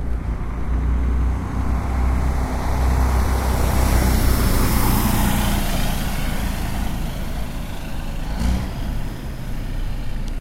Semi without trailer
Recorded the 20th of January, 2020 in Las Vegas, NV on a Tascam DR-05x internal mics.
A semi truck without a trailer was passing by as I started the recording.
automobile, truck